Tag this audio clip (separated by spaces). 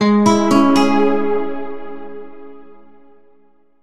public bus announcement railway busses station airports railroad airport jingle sound transport transportation stations train trains